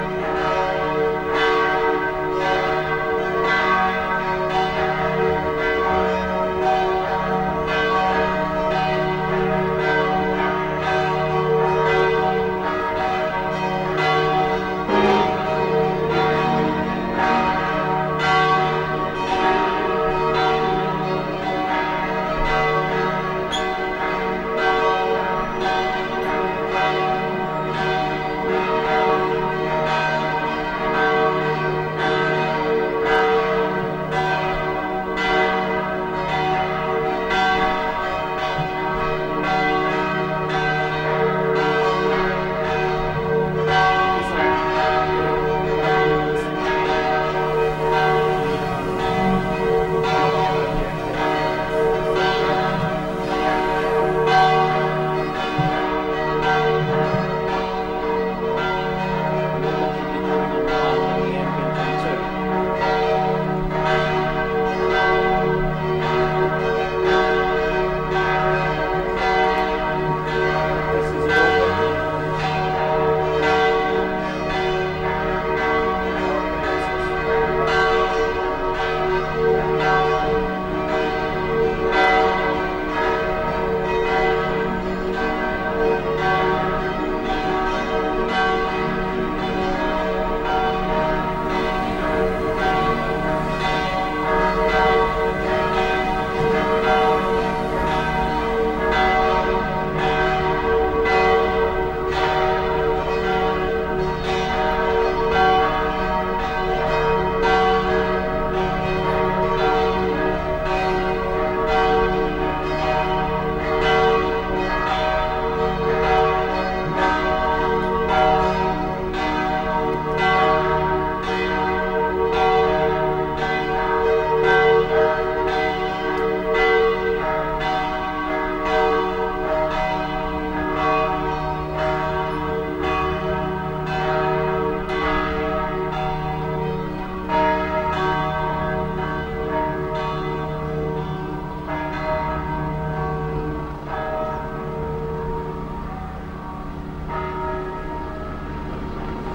church bells
High quality mono recording of Swiss Catholic monastery bells at Einsiedeln. Recorded direct to Soundforge from microphones placed in the 2 towers, one radio mic and the other wired.
Recorded during the setup for Weltheater 2007
church, monastery